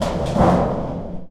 Door sheet metal
Slammed metal door sounding like a sheet of metal.
Stereo.
slamming, metal